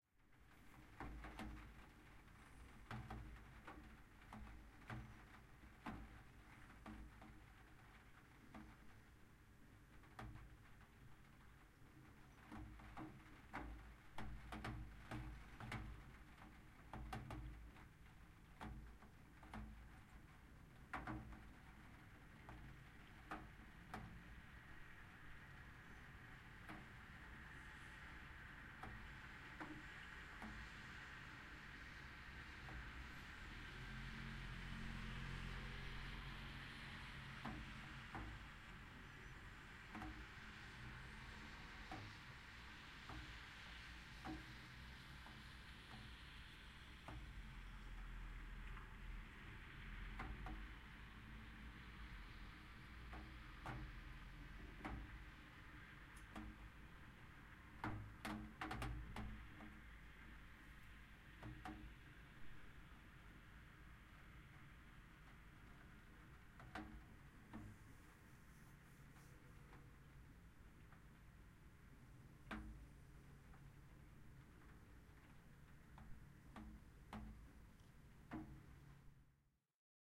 Raindrops on window sill 4 (far)
ambience, droplets, drops, nature, Rain, raindrops, raining, window, windowsill